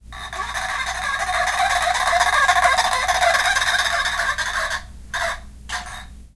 Toy phone makes squawking sound as it rolls along, recorded rolling past stationary mics, from left to right. Consistent speed, erratic towards the end.